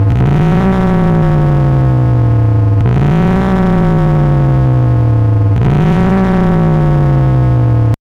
quantum radio snap012
Experimental QM synthesis resulting sound.